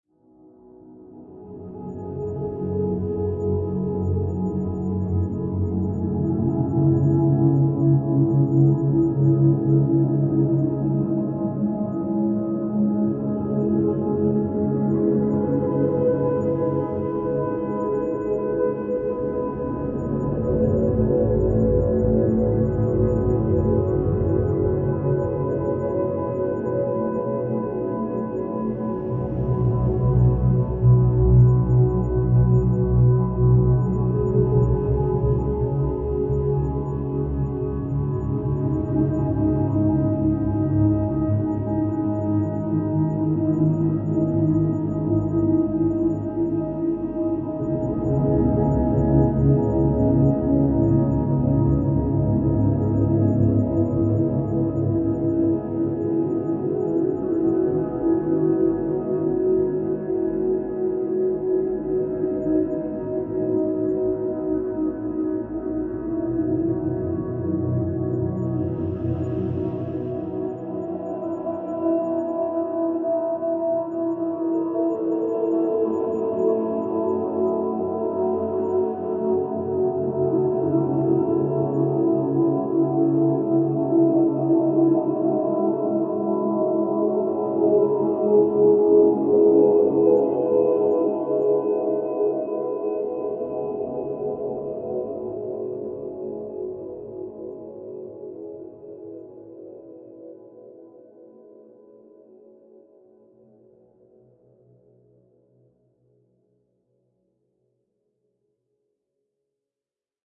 Ambient Wave 14 (Stretched)
This sound or sounds was created through the help of VST's, time shifting, parametric EQ, cutting, sampling, layering and many other methods of sound manipulation.
Any amount donated is greatly appreciated and words can't show how much I appreciate you. Thank you for reading.
๐Ÿ…ต๐Ÿ† ๐Ÿ…ด๐Ÿ…ด๐Ÿ†‚๐Ÿ…พ๐Ÿ†„๐Ÿ…ฝ๐Ÿ…ณ.๐Ÿ…พ๐Ÿ† ๐Ÿ…ถ
beat, beep, bop, created, dance, electronic, electronica, loop, loops, Manipulated, music, Sample, song, sound, track